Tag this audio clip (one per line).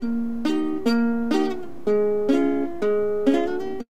nasty
bad-recording
guitar
sound